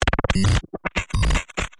glicz 0028 1-Audio-Bunt 4
bass, bunt, digital, drill, electronic, glitch, harsh, lesson, lo-fi, noise, NoizDumpster, rekombinacje, square-wave, synthesized, synth-percussion, tracker, VST